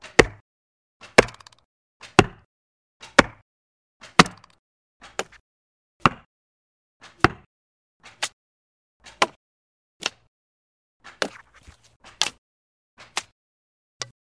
Impact Melon with target
Shooting a melon with a longbow, more of the target can be heard in this one.